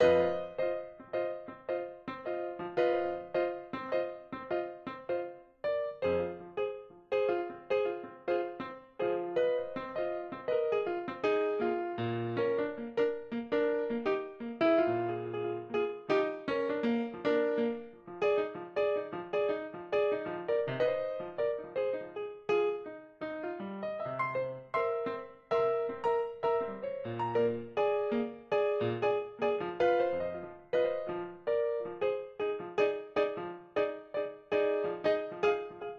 Song1 PIANO Fa 4:4 80bpms
Fa, bpm, Chord, 80, Piano, blues, HearHear, loop, rythm, beat